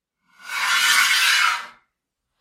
Metal Slide 1
Metal on Metal sliding movement